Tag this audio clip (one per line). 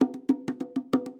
bongo
drum
loop
percussion